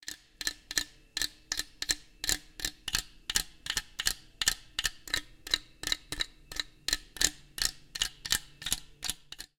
A TV Base as an instrument? Is it possible? Why, yes. Yes it is.
audio, percussion-like, Base, TV, studio